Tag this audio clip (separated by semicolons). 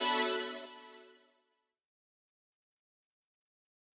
healing; healing-sound-effect; heal-sound-effect; video-game-sound